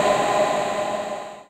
These set of samples has been recorded in the Batu Caves temples north of Kuala Lumpur during the Thaipusam festival. They were then paulstretched and a percussive envelope was put on them.
Thaipusam, Paulstretch, BatuCaves